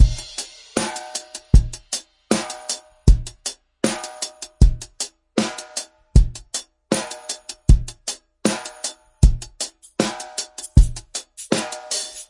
13 drum mix L
Modern Roots Reggae 13 078 Gbmin Samples
Modern, Gbmin, Samples, Roots, 13, Reggae, 078